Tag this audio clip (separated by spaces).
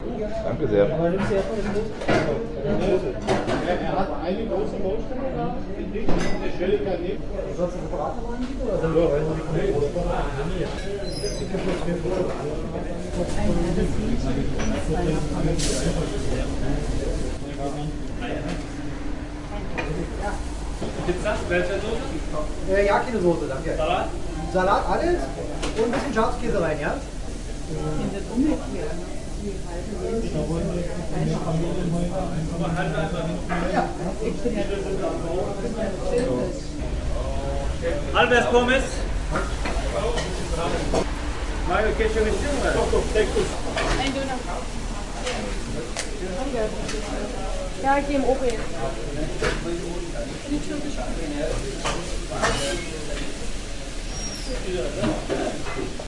geotagged berlin soundz metropolis